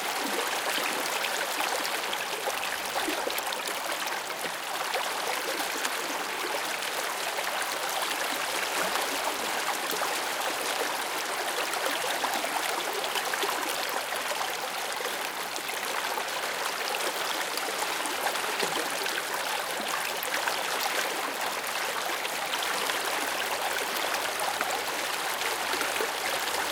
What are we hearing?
After rushing through a hydraulic power generator, the water on this river slows down and meanders through a bunch of boulders popping up through the water. This recording is a close-up recording of one small eddy within this river. Recorded with a Zoom H4 on 24 July 2007 in High Falls, NY, USA.